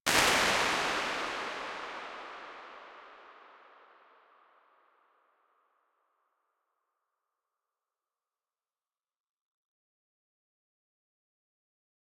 IR ST Cave 01
A digitally modelled impulse response of a location. I use these impulse responses for sound implementation in games, but some of these work great on musical sources as well.
acoustics, convolution, echo, impulse, IR, response, reverb, room, space